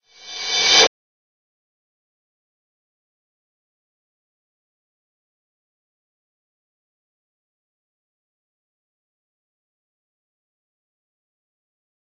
Reverse Cymbal
Digital Zero